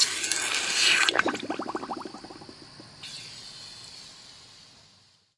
Road flare extinquished in water burn fire burning bubbles